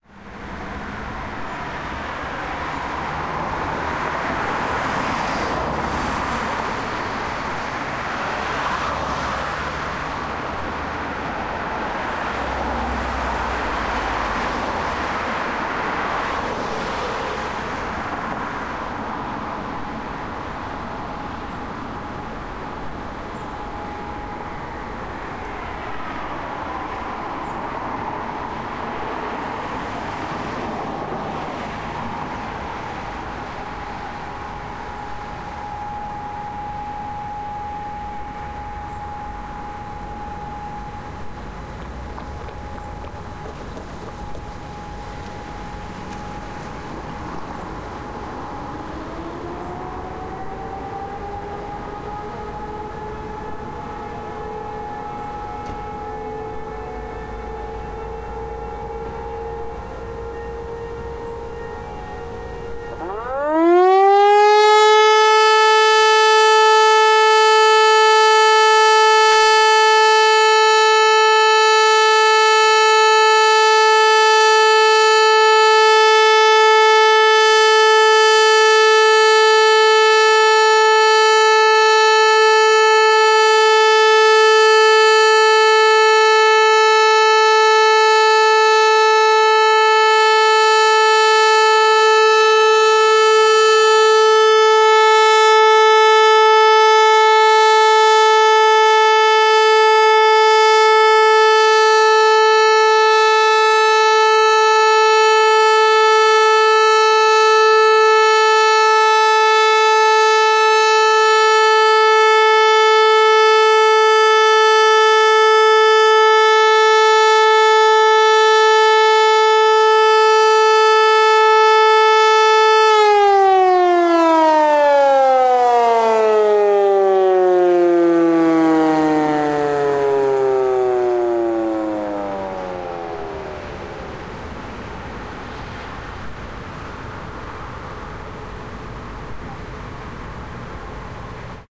HLS273 Sirene von Hörmann. Aufgenommen in Köln-Niehl bei der Sirenenprobe vom 10.10.2015 im Raum Köln.
Signal: Warnung. Aufnahme über Kompressorfunktion verstärkt.
HLS273 mechanical Siren manuf. by Hörmann. Recorded in Cologne-Niehl at the big siren-testing in october 2015.
Signal: All-clear. Recording was amplified using the compressor-function.